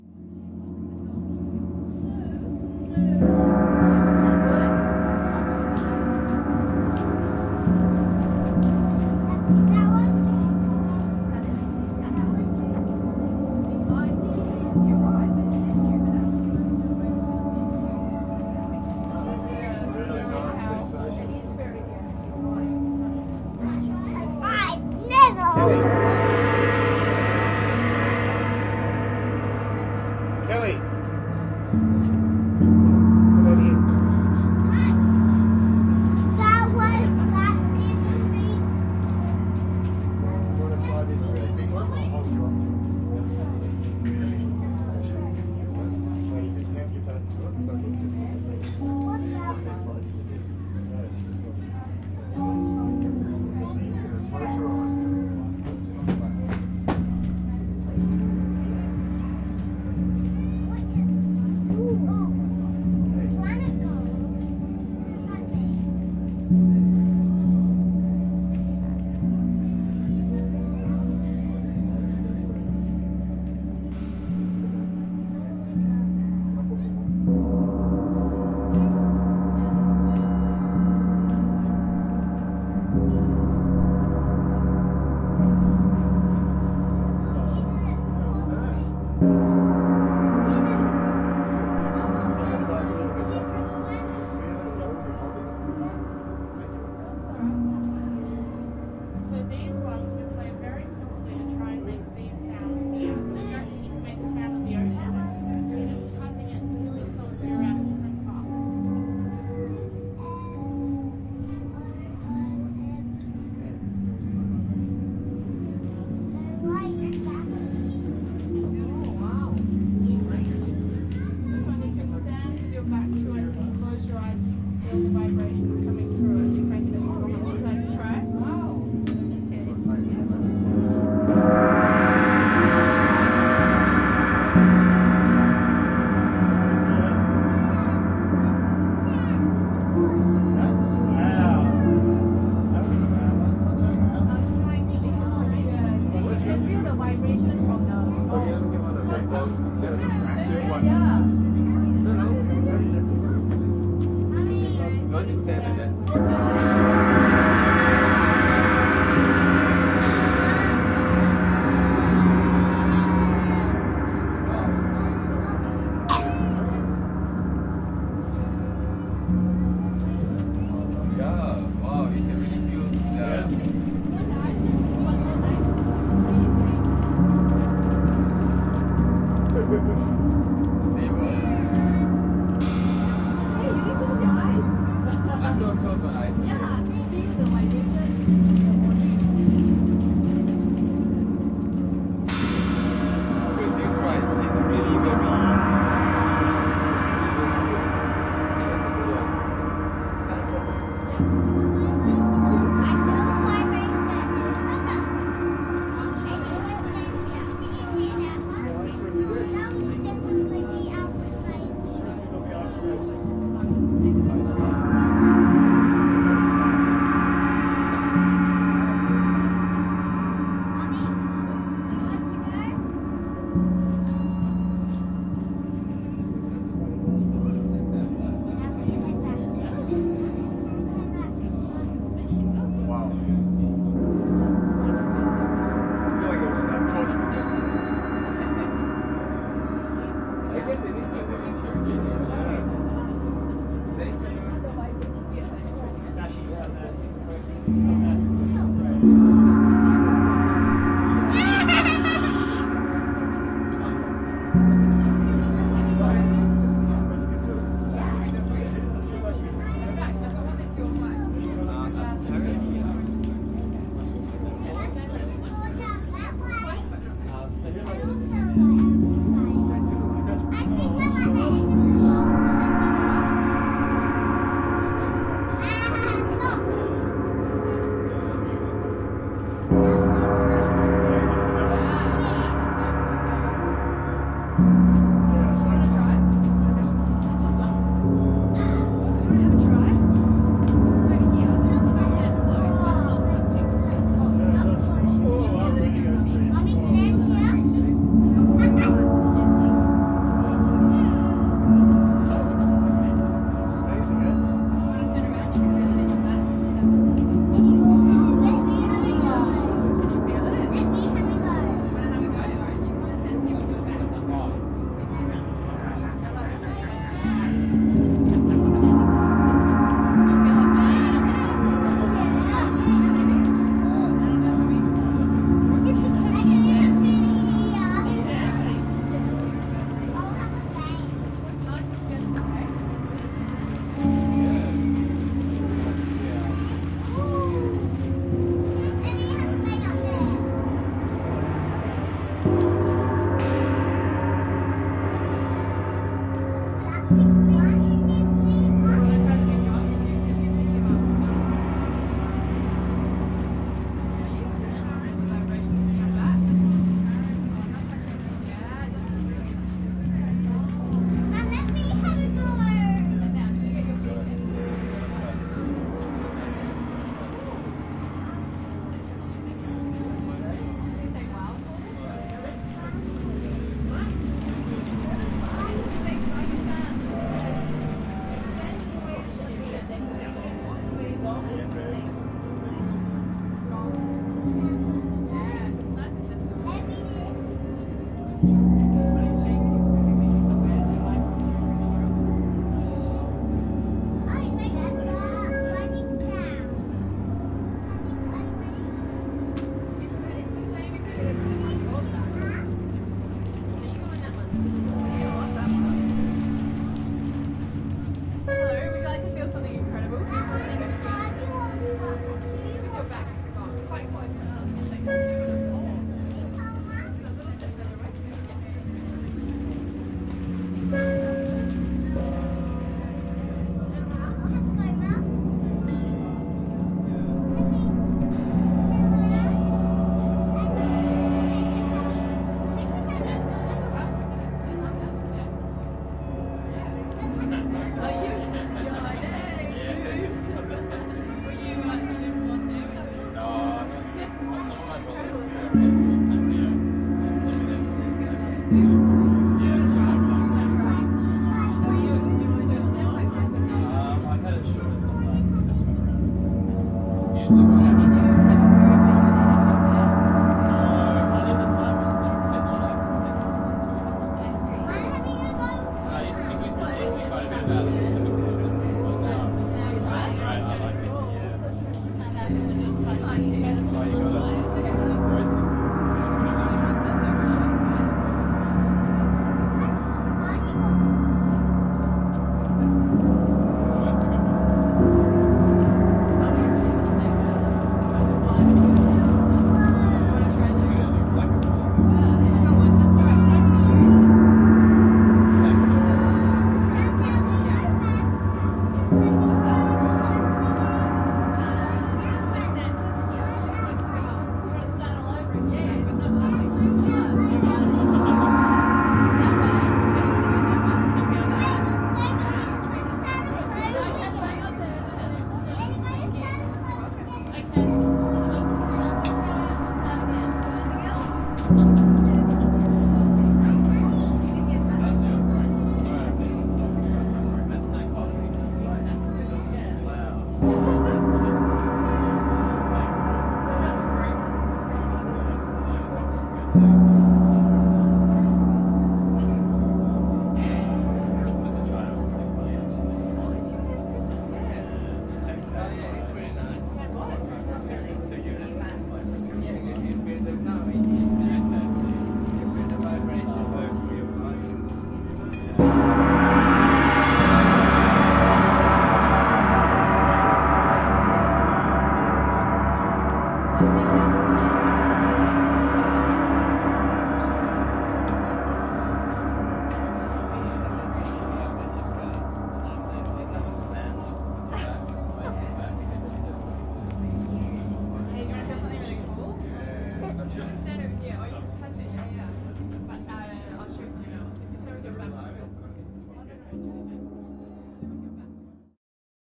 Gong Garden - Planet Gongs Atmos
Recorded in Michael Askill's Gong Garden, Melbourne. The Gong Garden is an interactive soundscape, where people are invited to play, feel and listen to a myriad of gongs that are set out.
Planet Gongs 2
ambience, atmos, chatter, Gong, atmosphere, field-recording, people, soundscape, ambient, atmo